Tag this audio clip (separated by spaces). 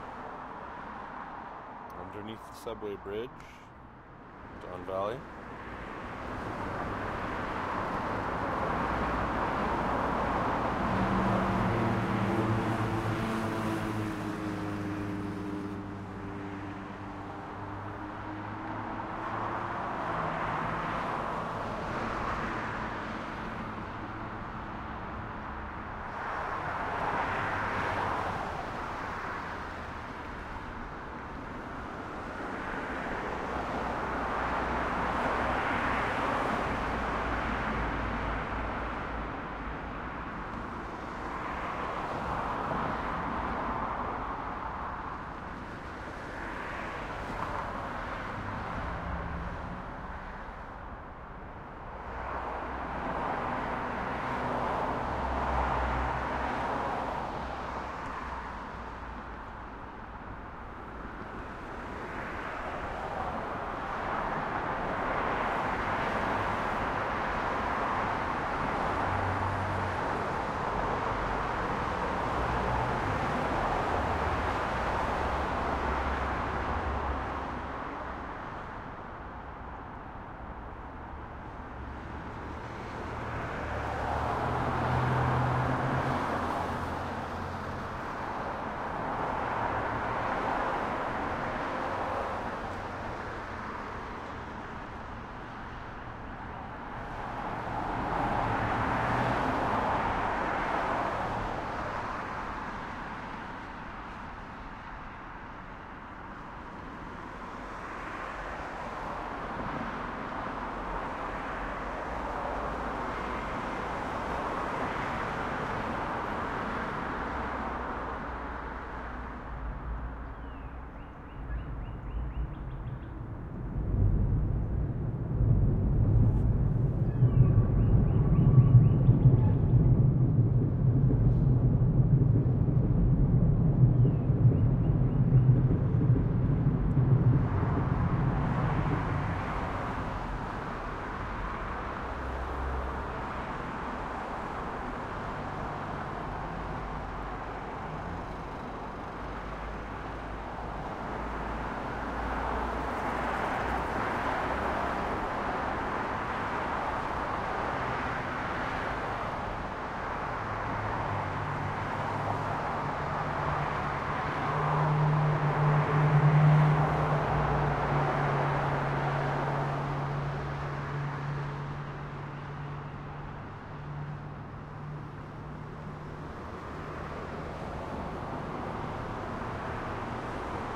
bridge bys don parkway pass subway toronto traffic under valley